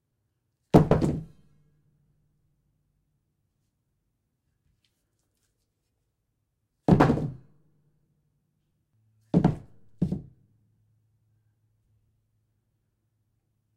Brick falls higher pitch x3
Mic about 10 feet away, dropping a brick in a bathroom. Audio raw and unprocessed.
brick, brickle, bricks